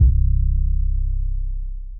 Some self-made 808s using various synthesizers.
808, distorted, fat, heavy, long, trap